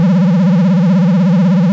Bllrr-text-loop
Text being written on screen in a game. If you have played GameBoy Advance you know what I mean; a sound similar to this is used in most GameBoy Advance / Nintendo DS games.
I then changed the pitch slightly in Audacity and made the sound loop-able.
(This was originally created for a game project of my own.)
dialogue, game, game-boy, game-boy-advance, game-dialogue, loop, retro-game, text, text-box, text-on-screen